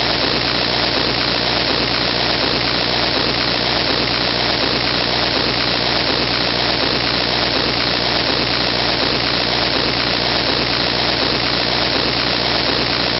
Static noise sound effect.
Noise, Sizzle